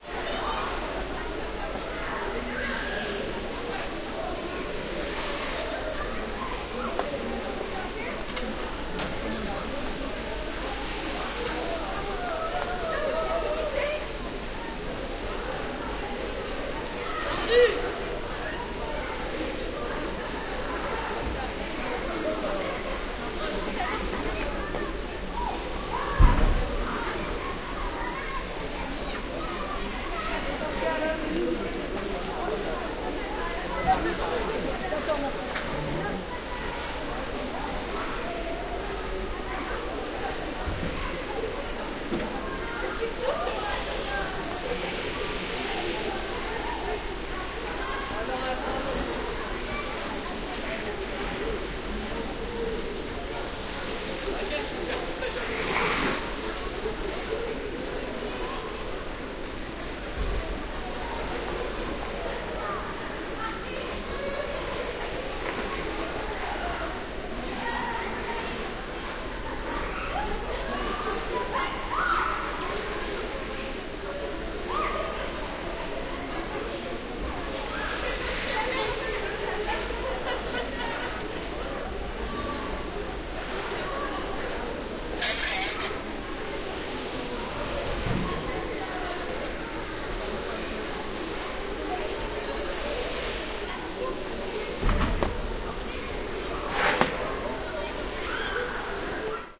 lehavre eishalle
Inside a Patinoire (Icehall) in LeHavre, France. People skating and having a good time.